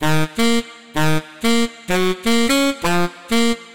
Calabria Saxophone Melody Loop - With Reverb
Honestly, I am impressed with myself!! I remade the loop from Calabria 2008 by Enur (covered by Strange/Dance Fruits, Nathan Dawe, etc.) with ReFX Nexus 4.5 from a preset in the Deep House expansion called "Piano and Sax." The sample is set at 128 BPM (a nice tempo 😉). I made it in FL Studio and added some distortion, because the original patch didn't have enough power.
This version has reverb.
dance, edm, house, loop, multiphonics, sax, saxophone, soprano-sax, soprano-saxophone